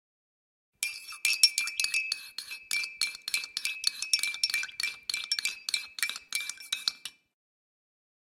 Mixing coffee with spoon
coffee, mix, spoon